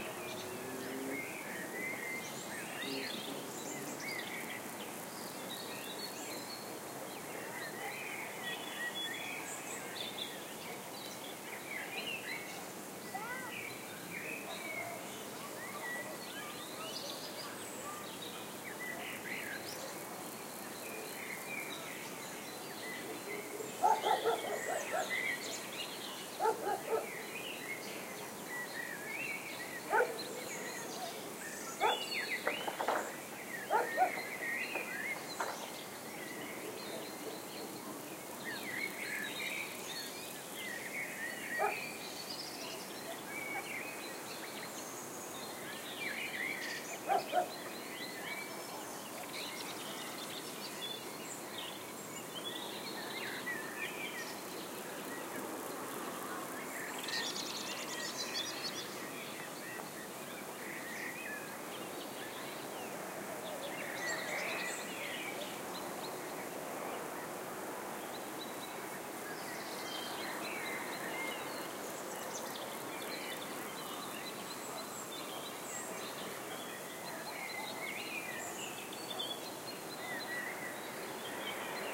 This is the ambiance on a July summer evening in the countryside west of Brussels. The birds and the crickets sing, the dog barks, the children play. Came back completely relaxed from the recording session. Recorded with a boom pole mounted AT825 to Sound Devices 702. I extended the boom to its full 3 meter length and stood it up vertically.